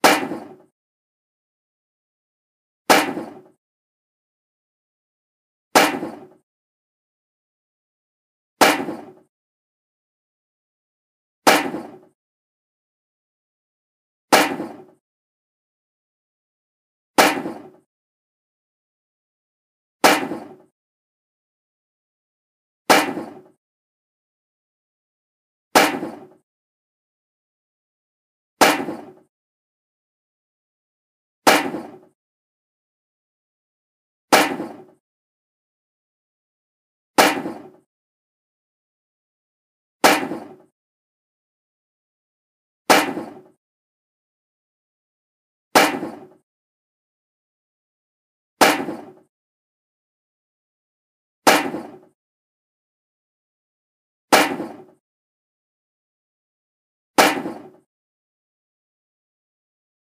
21 Slam Salute
1 Minute sample loop of a table slam (putting a cup on a steel table next to an iPod 5 microphone), Easter Saturday, Neutral Bay, Sydney, Australia.
City, 1-Minute, Table, Salute, City-of-Sydney, Australian-Government, Slam, Steel, Minute, 21-Gun, Australia, Gun, Tin, Cup, Sydney, 21-Gun-Salute, 21